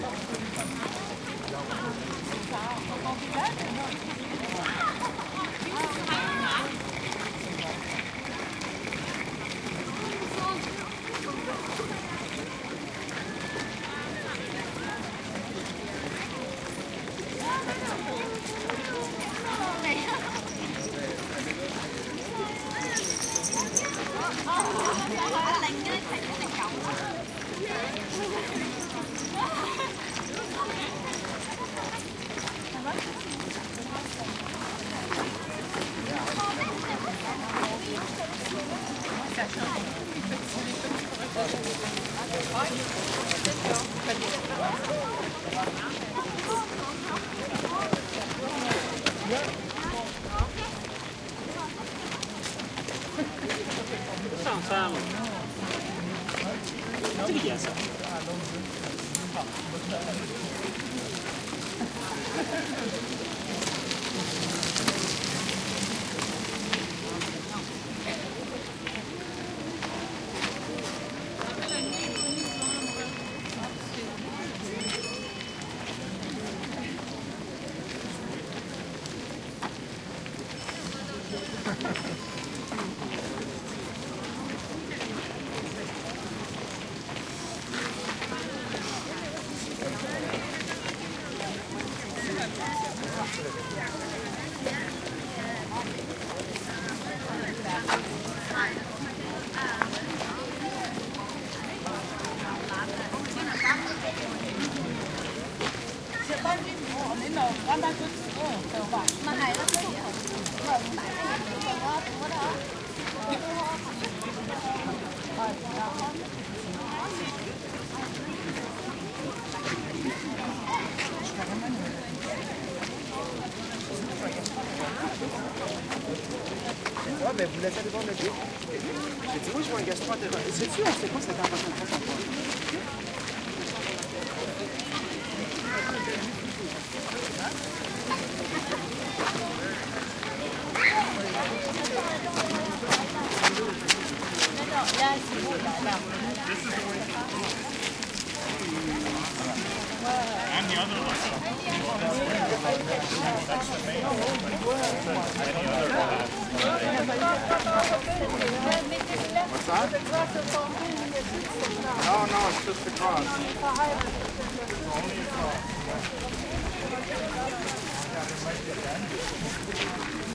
footsteps medium crowd ext gravel park good detail
crowd, ext, footsteps, gravel, medium, park